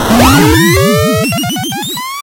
SFX Powerup 35
8-bit retro chipsound chip 8bit chiptune powerup video-game